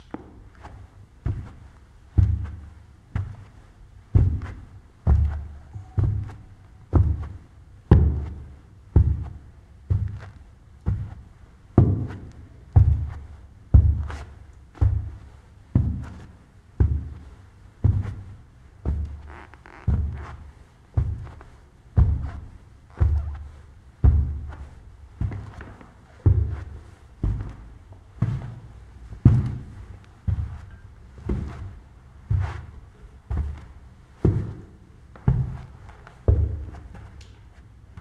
Walking Footsteps on Carpet
carpet, Footsteps, corridor, long, a